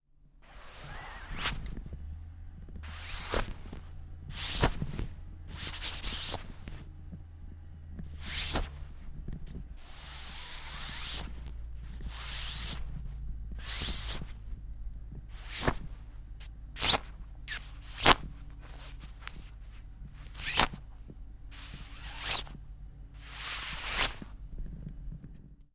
Finger Dragged Across winter Jacket
Finger being dragged on a noisy winter jacket.
cloth, clothes, clothing, fabric, Jacket, material, movement, Noisy, nylon, rubbing, rustling, swhish, swish, textile, texture, Waterproof, Winter